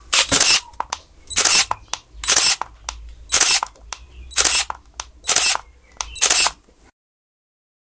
Camera Flash Sound
Camera flash and shutter sound.
flash, camera, Digital, shutter, OWI